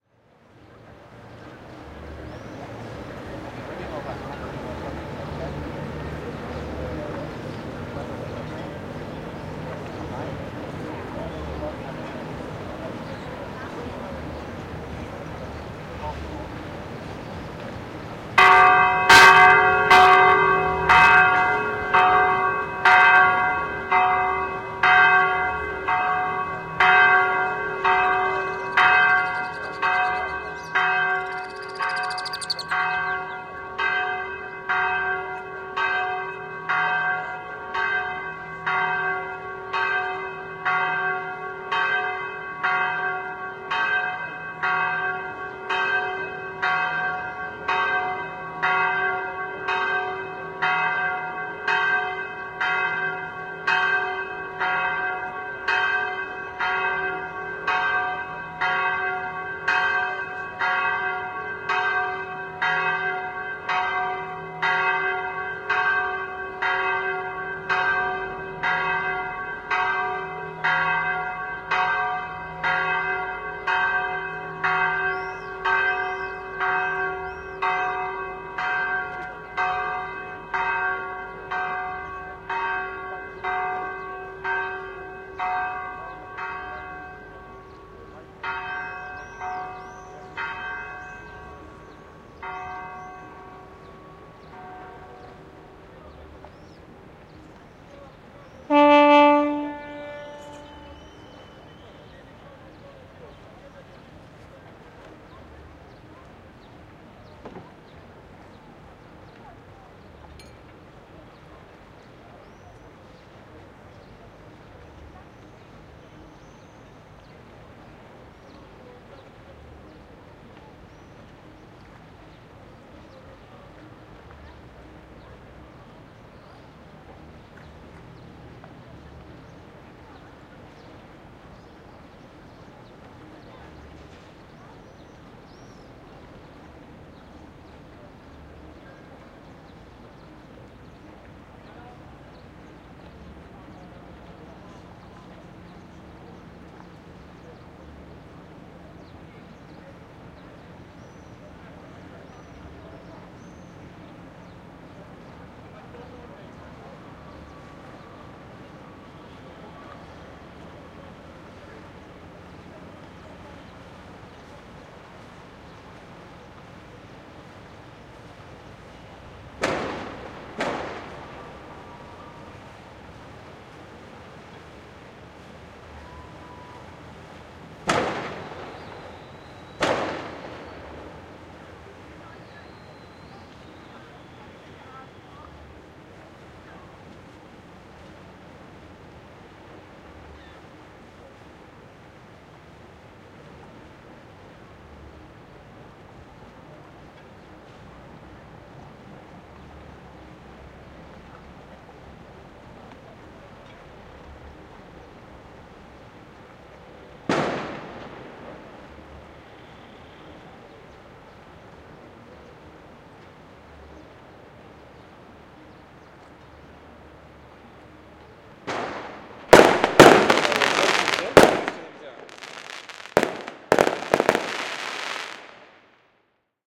07.05.2016: recorded between 17.00 and 19.00. On Walls of Dubrovnik (Old Grad) in Croatia. Ambience of the Old Port/Harbour (birds, boat signal, chuch bells). At the end wedding fireworks. No processing (recorder martantz pmd620mkii + shure vp88).